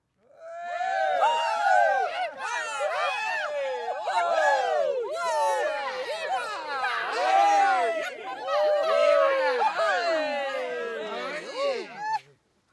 Group of people - Cheering - Outside - 10
A group of people (+/- 7 persons) cheering - exterior recording - Mono.